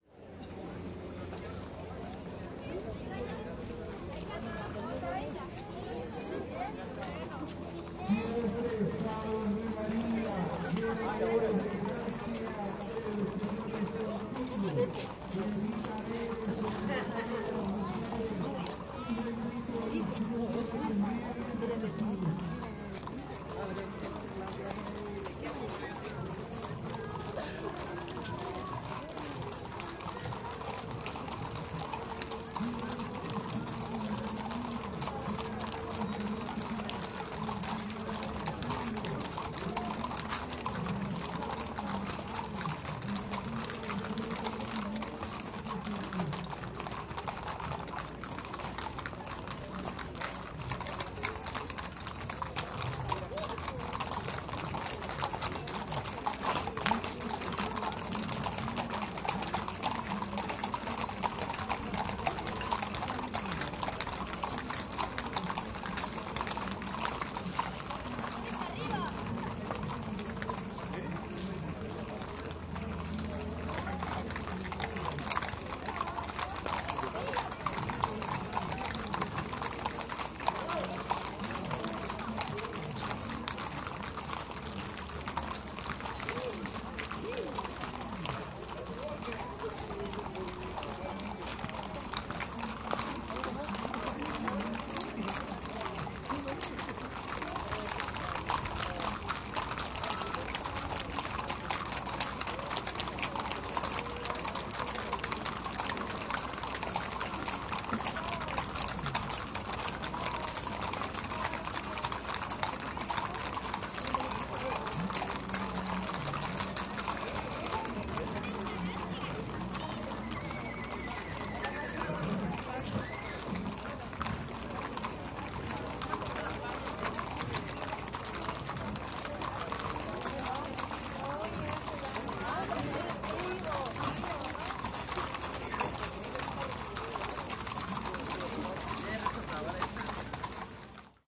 Horses on pavement
Cloppity-clop-clop-clops, horses ridden by chilean Huasos advancing on a rural street during a religious procession in San Francisco de Curimón. You can hear hooves, voices, neighs and spurs ringing.
Recorded on a MixPre6 with USI Pro microphones in a binaural setup.